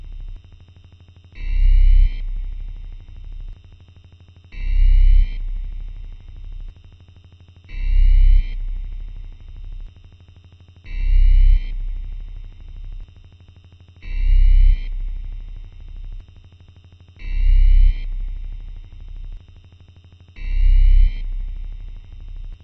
Alarm sound 15
A futuristic alarm sound